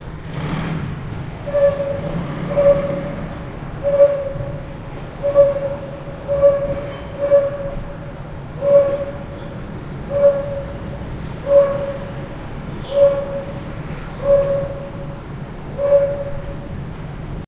An unseen neighborhood dog barking from a balcony of a high-rise apartment building 100 meters away. Echo caused by a labyrinth of tile-covered buildings.